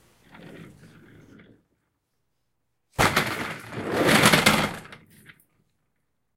Modern office chair, pushed, rolled, and crashed. (created for radio play fx)